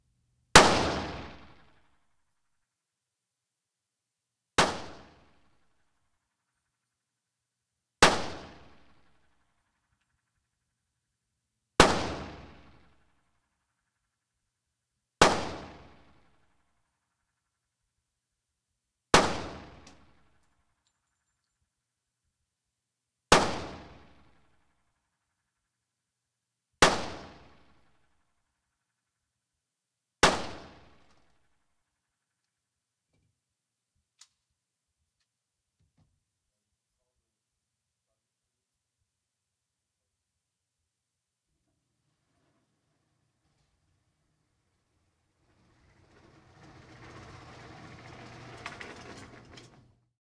INT .45 ACP Handgun with Target rack recall
During the recording there are a couple shell casing that can be heard hitting the floor. There is a long break after the final shot before the target is recalled on the automatic rail system. There is a slightly echo-y natural reverberation to the gun range. Other than that the recording is very quiet in-between shots and each shot sound was allowed to dissipate before another round was fired.
bullet, bullet-casing, gun, gun-shots, interior, mono, pistol, shots, sound-effect, target-rail, weapon